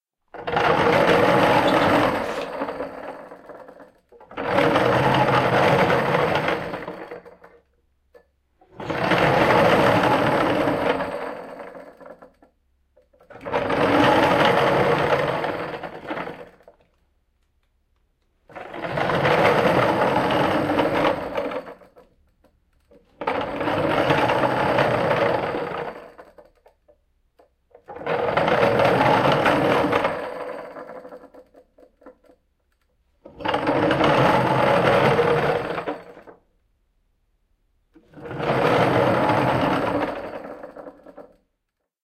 Wood On Rollers
A stereo recording of a length of wooden beam being pushed along a series of parallel steel tubular rollers, mounted with needle bearings in a framework (roll-off table). Rode NT-4 > Fel battery pre-amp > Zoom H2 line-in
machinery, wheels